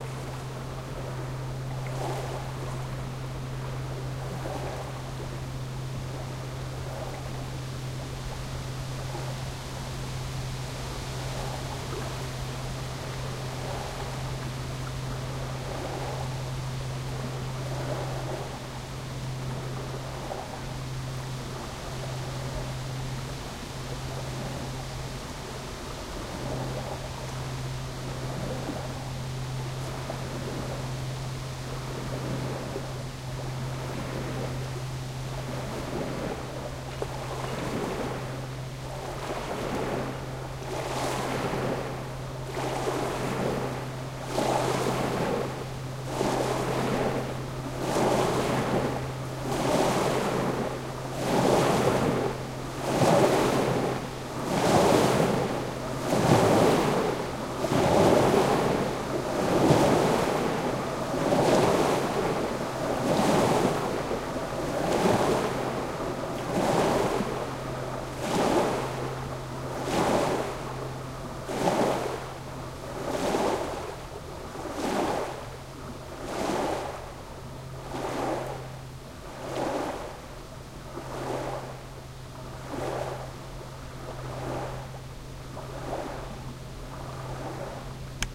The sound of ripples and of a motorboat passing by. Recorded by me on a Tascam DR-05 in France in 2021.
bateau, boat, cote, engine, moteur, motor, motorboat, ripple, river, riviere, shore, vague, vaguelette, water, wave, wavelet, waves, yacht